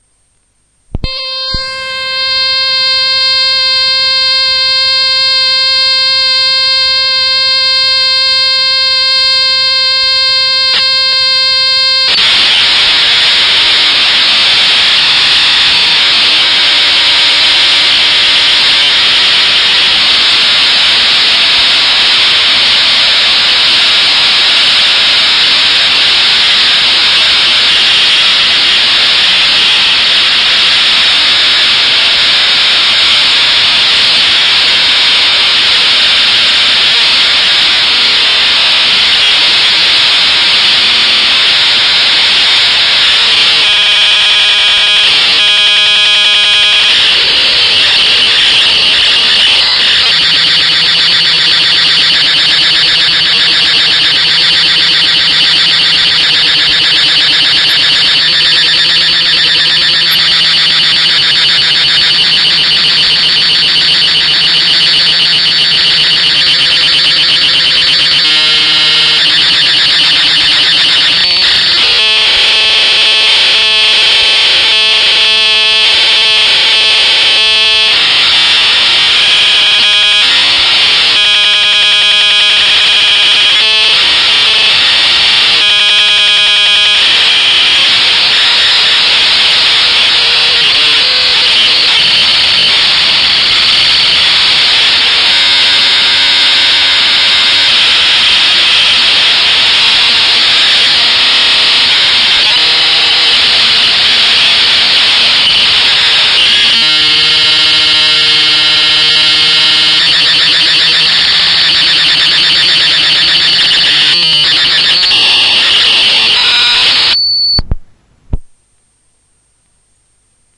Commodore cass 4
Part of a Commodore 64 cassette played on a deck and recorded through the line-in. Contents unknown.
datassette, commodore, cassette